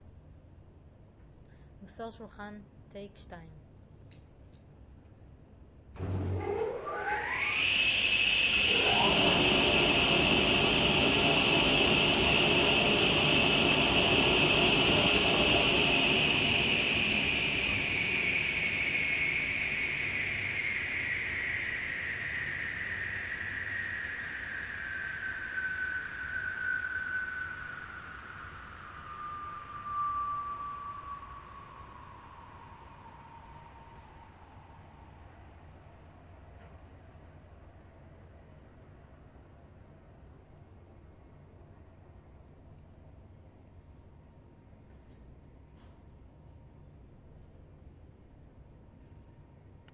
Tablesawnaama agassi
worktools, Tablesaw